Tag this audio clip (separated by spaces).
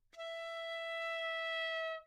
E5 good-sounds multisample neumann-U87 piccolo single-note